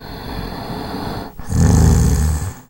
My father, snoring.
environmental-sounds-research, man, snore, snoring